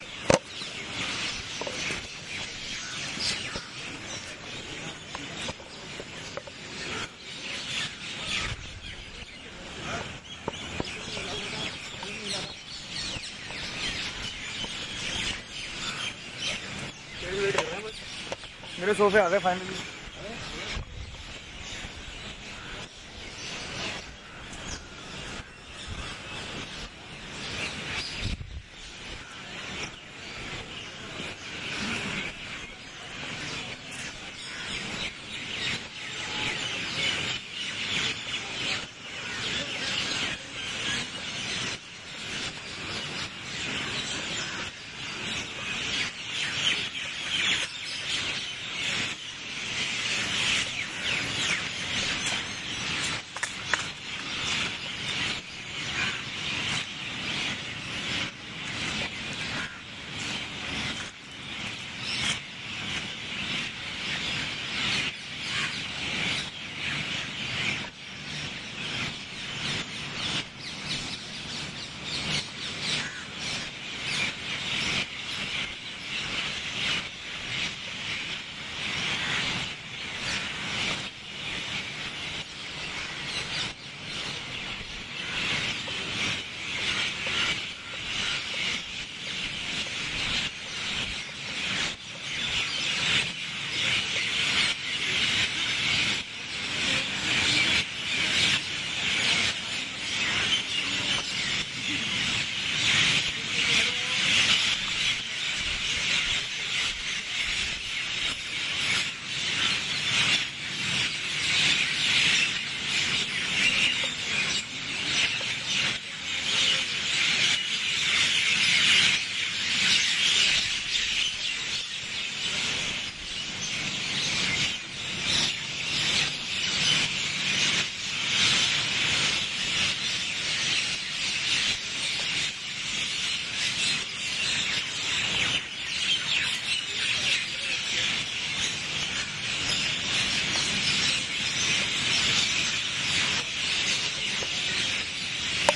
Lodi garden birds
Recorded using Tascam GTR 1. An evening in Lodi Gardens in New Delhi. The place where I recorded is surrounded by tall trees where all the birds congregate around sunset.
evening, Field, nature, recording